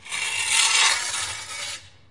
This sound are taken at Hahn, Germany in may 2013. All the sound were recorded with a zoom Q3. We have beat, scrap and throw everything we have find inside this big hangars.